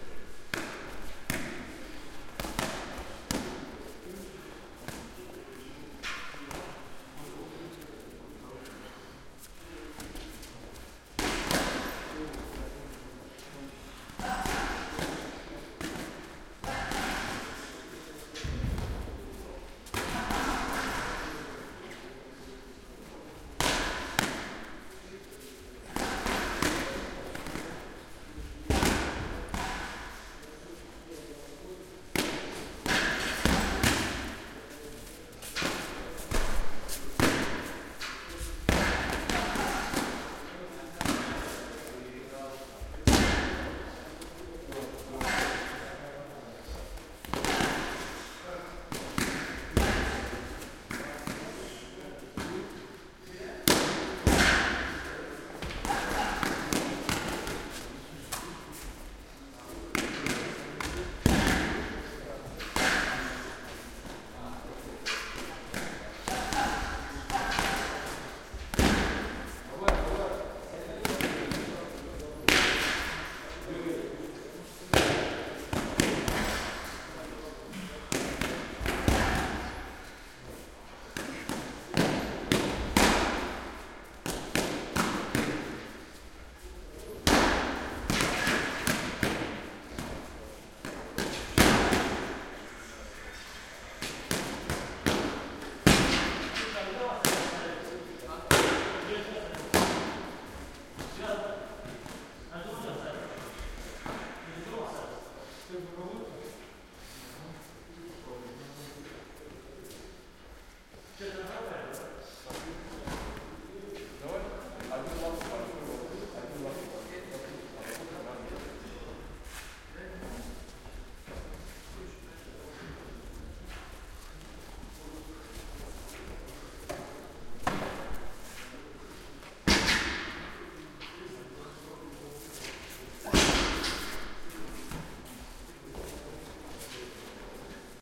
XY recording made with Roland R-26 built-in mics in fight-club gym during training.